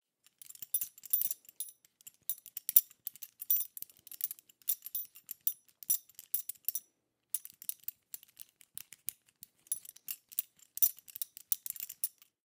Metal BeltBuckle Jingle 001
Foley effect for a small metal belt being jingled.
belt, clink, jangle, metal